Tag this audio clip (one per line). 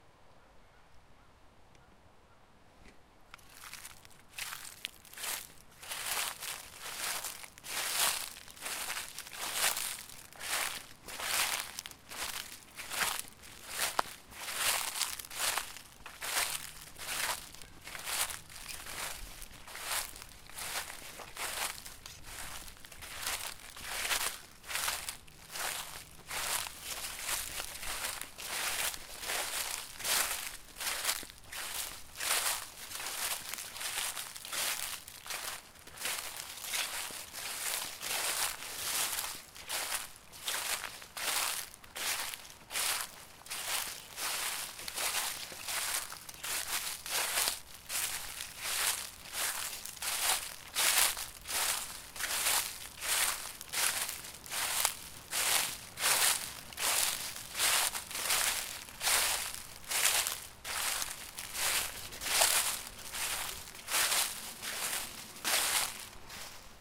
fall
leaves
trees